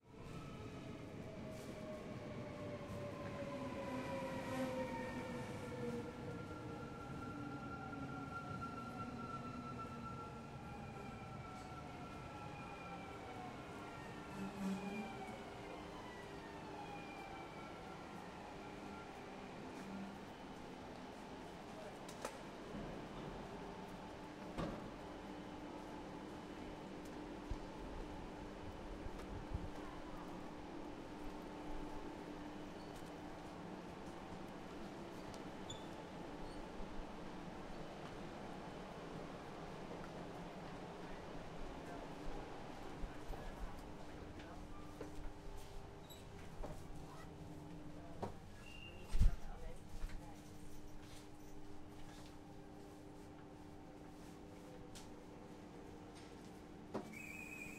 Train arriving underground
Train arriving at station
Recorded with Rode Micro and iPhone Rode app